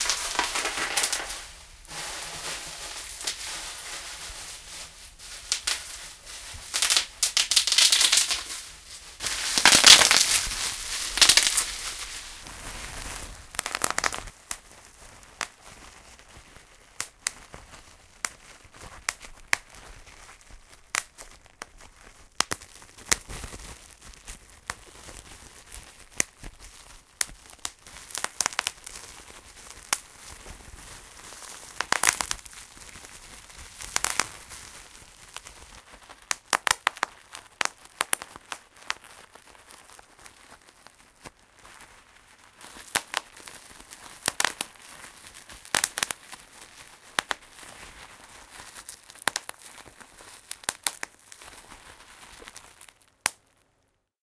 Noise of a special protection envelope (this envelope contains a little bubbles and one can crack it so it sound like fireworks)